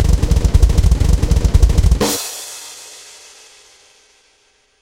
It is what it is(a MMM2006-drum sample cut up and edited)...